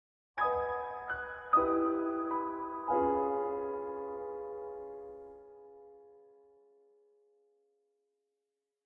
A phrase in style of Debussy and contemporaries.
story-telling, atmosphere